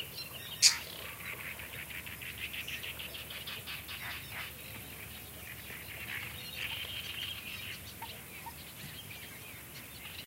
single bird cry. Shure WL183, Fel preamp, PCM M10 recorder. Recorded at the Donana marshes, S Spain
screeching, summer, nature, bird, field-recording, marshes, south-spain, donana